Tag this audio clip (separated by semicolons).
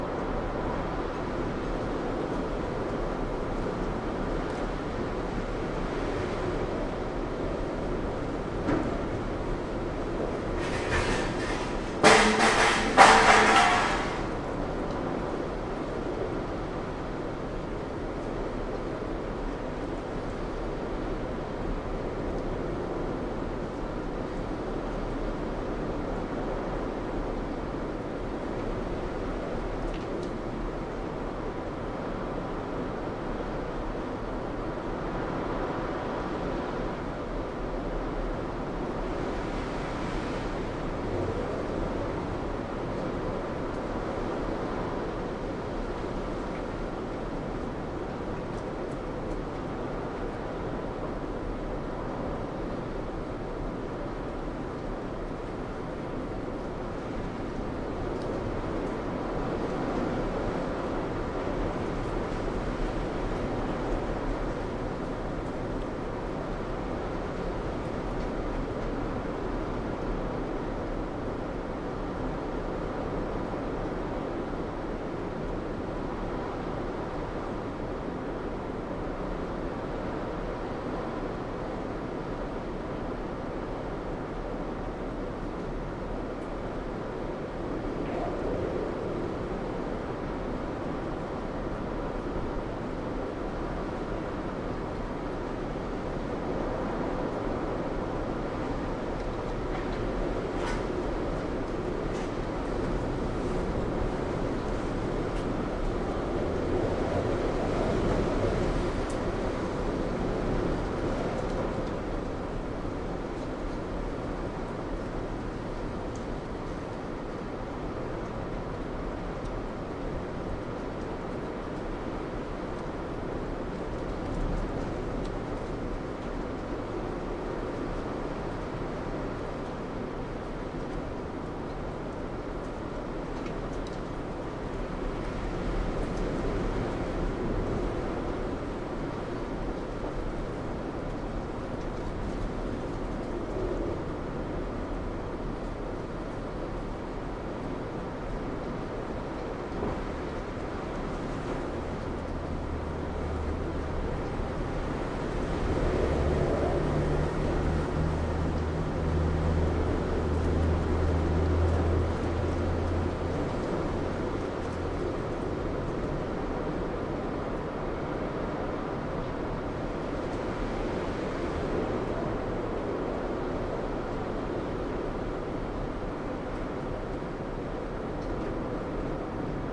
varberg; brittain; storm; halland; sweden; wind; woosh